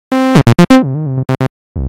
Untitled song5
Loops generated in Propellerhead Reason software.